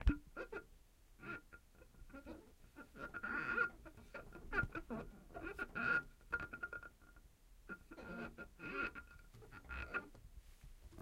Hand on wet glass
glass, hand, horror, Scribbling, trapped, wet, wipe, wiping